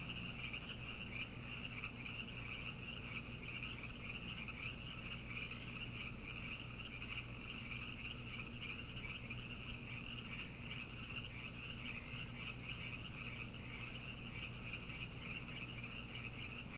Frogs in Creek 1
Large group of frogs croaking in a creek
frogs, nature, creek, frog, croak